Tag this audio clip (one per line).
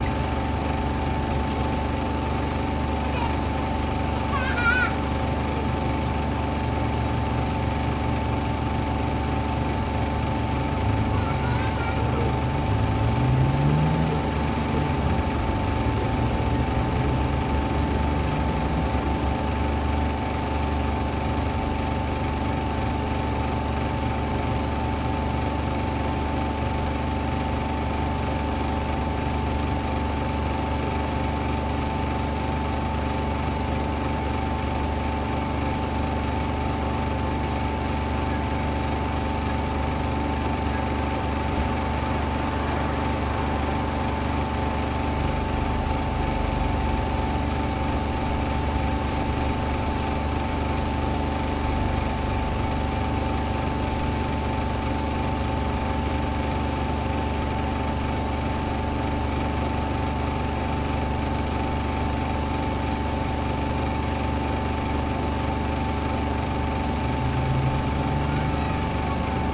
city
noise
split-system
street
air-conditioning
Omsk
hum
air
town
conditioning
Russia